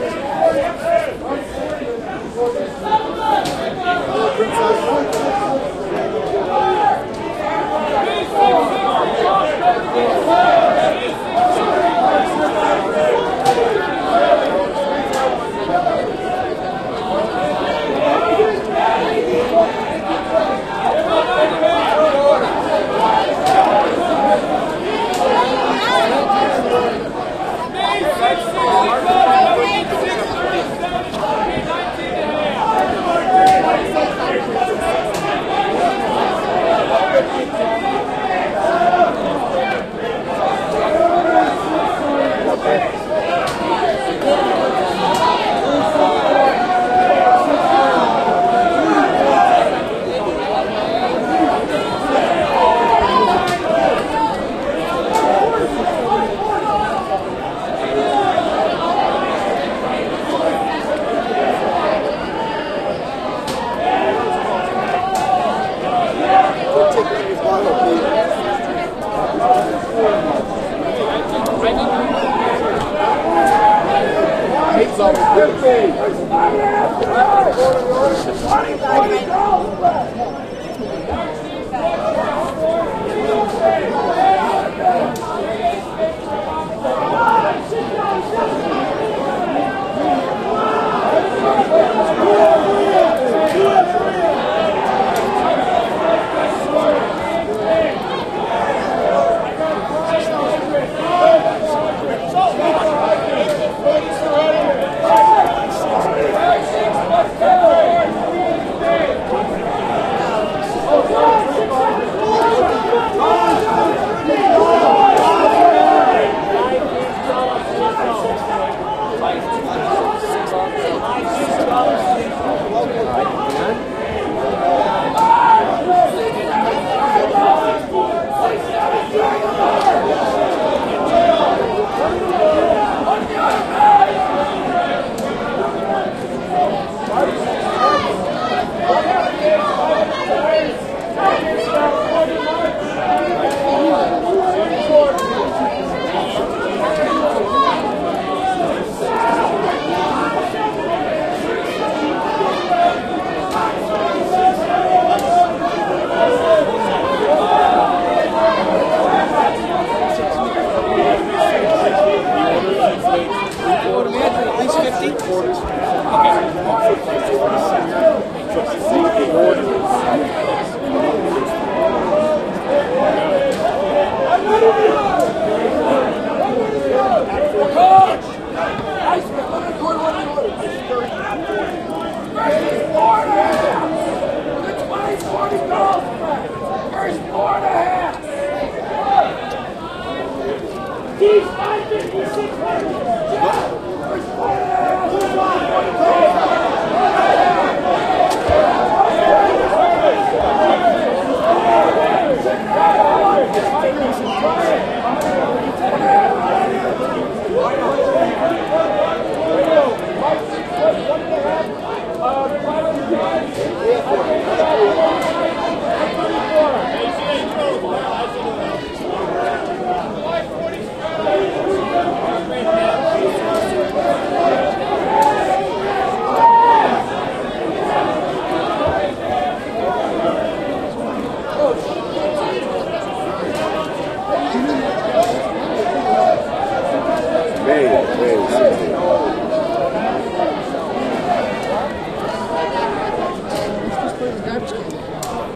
Floor trading6
Sounds from a stock exchange trading floor, phones ringing, light chatter and conversation,